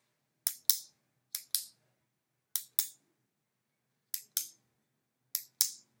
pen click
cick, clic, lapicero